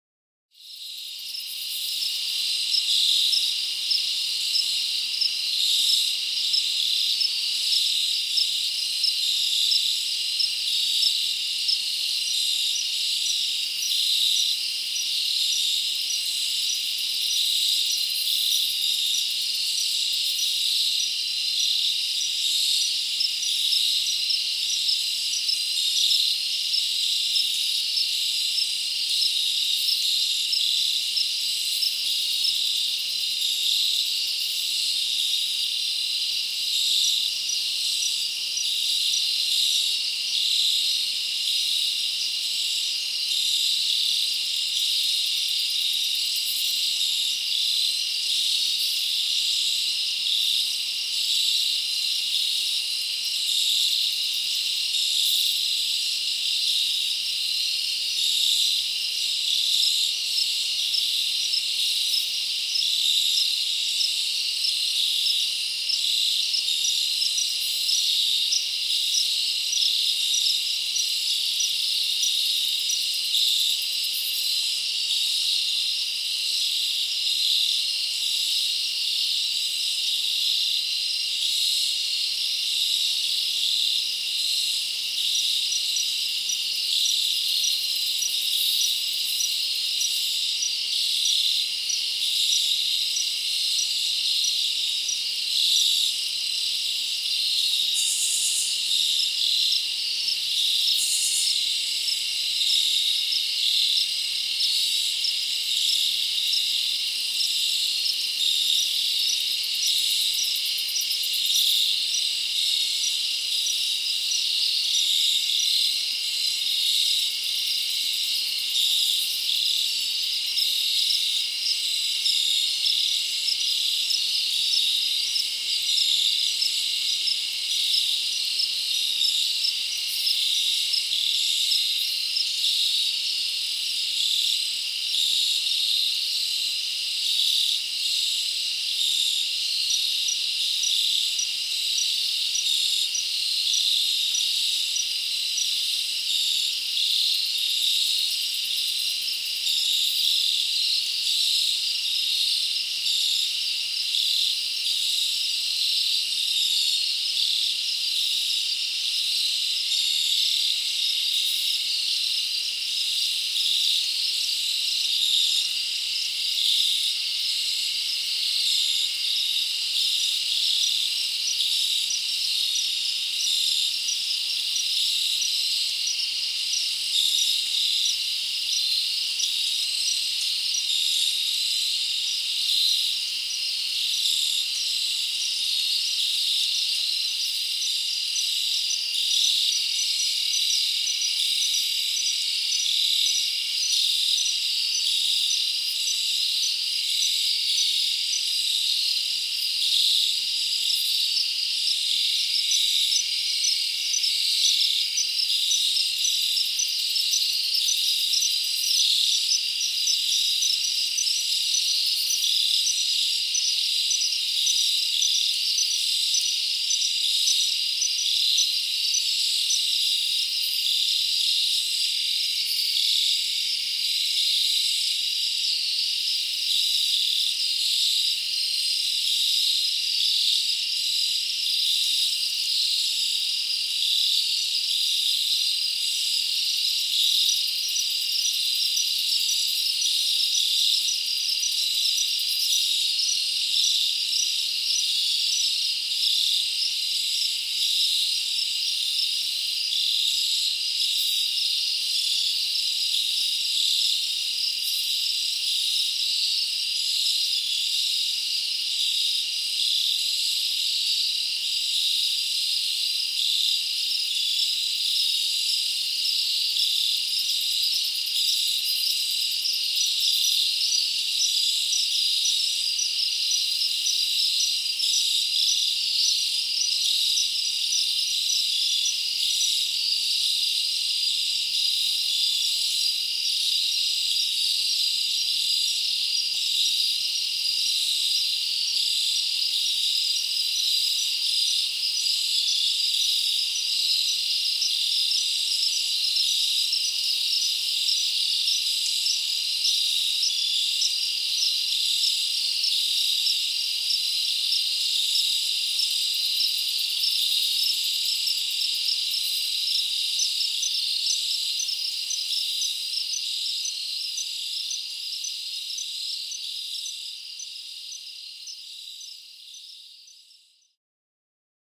Fall Field Crickets - 1
Recording of fall field crickets (chirping/droning) on 10.6.2014 in the deep woods of East Texas - used a Tascam DR-680 recorder (Busman mod) with 2 Rode NT1A mics. Mics setup with 120°angle/21cm spacing, 6 feet above ground. Recorded 1am to 4am - fairly quiet except for highway traffic 2-3 miles away. Light breeze from direction of highway, which brought in traffic noise some. Download is smoother sounding, less brittle.
Technical:
EQ'd down distant traffic noise and wind, most everything from 1500 Hz and lower. Rolled off everything < 16 Hz. NT1A's tend to be a little bright, so also EQ'd opposite the mics' freq chart to smooth out sound better. Stereo width okay, no adjustment. Did EQ a couple of crickets down, mainly some loud ones closest to the mics. That made it easier to hear crickets with lower tones and those droning instead of chirping.
tascam-dr680, boykin-springs, forest-crickets, NT1A, crickets, fall-field-crickets, chirp, east-texas, chirping, field-crickets